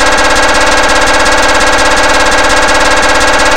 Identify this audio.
just a noise i found slowing down something